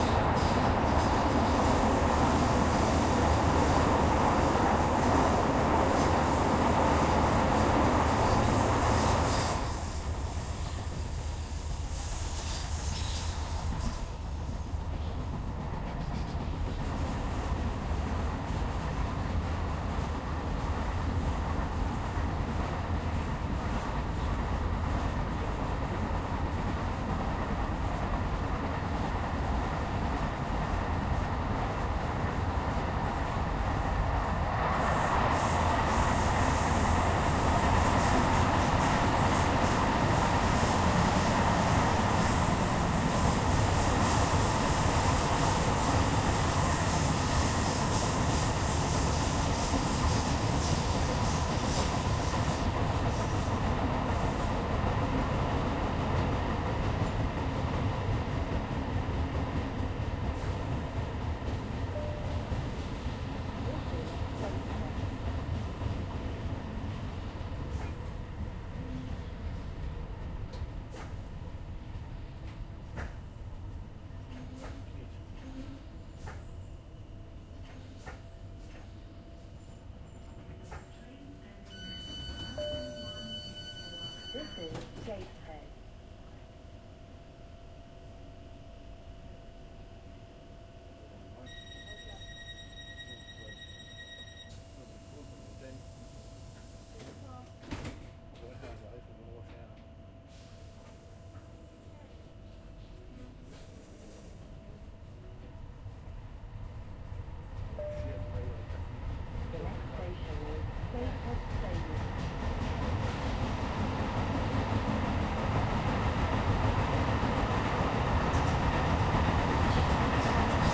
Interior train tunnel, underground, metro, subway, cutting, bridge, underground station
other-train-passing, travel, above-ground, tube, tunnel, metro, overground, travelling, light-rail, train, underground, field-recording, moving, interior, subway, other-train-passes, cutting, train-passing